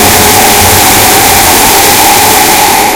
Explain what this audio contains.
Distorted Screech
Just Some Crappy Jumpscare
Made With Audacity
fear, horror, terror